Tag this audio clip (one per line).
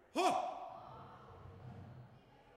sampling
One
recording
human